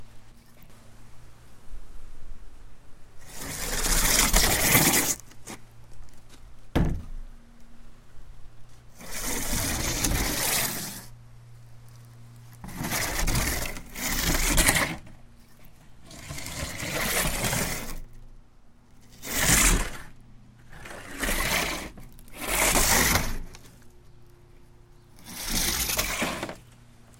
scraping-sandy
A sandy, scraping sound I used in one of my audiobooks. Do what you want with it.